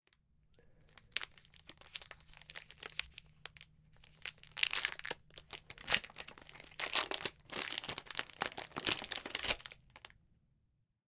Candy Bar Plastic Wrapper
Unwrapping a plastic wrapper of candy bar. Crinkling the wrapper.
Sound, candy-bar, Free, wrap, plastic, wrapper